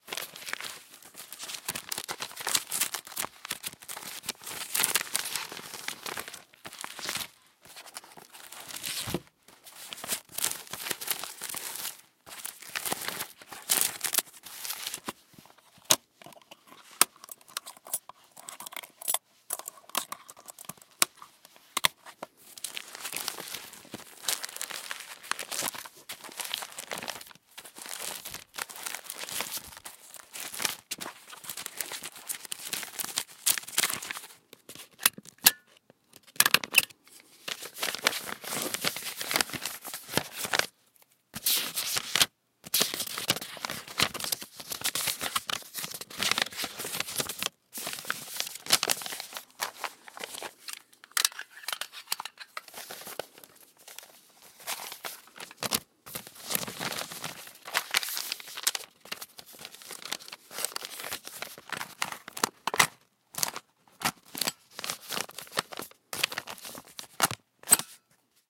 Office File Folder
akten, aktenordner, equipment, fax, file-folder, files, handling, home-office, office, ordner, paper, sort-by, staples, work